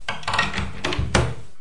Door Opening
Opening my home door with keys.
close, door, opening, short